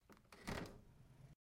Open balcony door
Opening balcony door
sound, door, house, balcony, samples, creepy